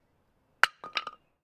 Wood falling: The sound of a small plank being dropped and falling on a brick floor, hard impact sounds, loud sounds. This sound was recorded with a ZOOM H6 recorder and a RODE NTG-2 Shotgun mic. Post-processing was added in the form of a compressor as to attenuate the sound's transient that caused clipping, while still keeping the rest of the sound's levels strong and audible. This sound was recorded by holding a shotgun mic close to a dropped wooden plank as it hits the hard ground and bounces a bit. The sound was recorded on a bright, quiet, sunny day.
falling-sounds, objects-falling-sounds, OWI, wood-falling-on-a-hard-floor, wood-falling-sound, wood-hitting-ground-sounds, wood-sounds